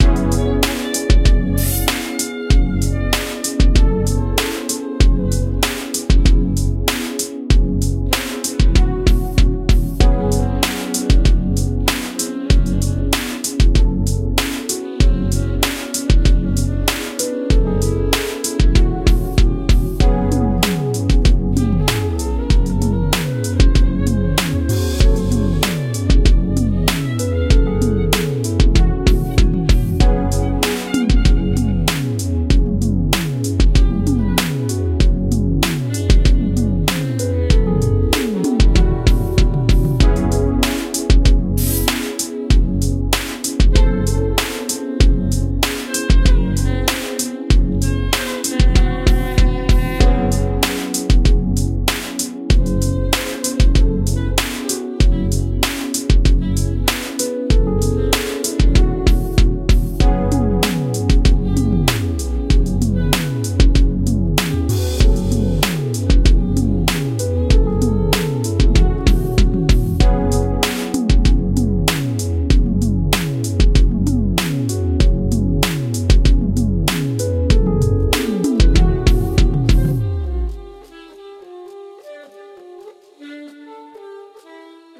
ASM goof LOOP 4 Soul
Loop, Reason, Rhythm, beat